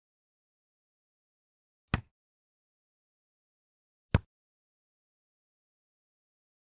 09 Volleyball outdoor hit-2
Panska, CZ